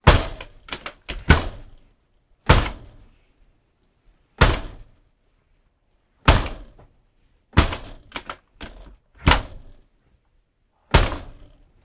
door metal screen door in trailer rv close hard slam or hit close various
rv, slam, trailer, hit, or, close, metal, door, hard, screen